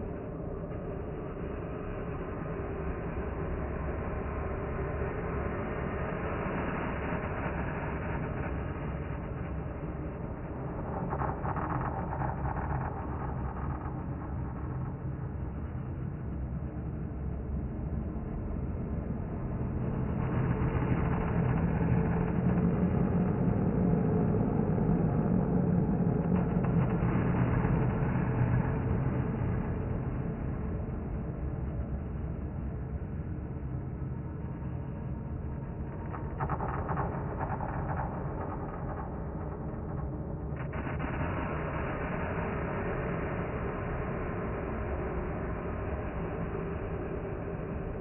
slow dark granulated drone sound with lots of delay and reverb, not much low tones
spooky, dark, drone, menacing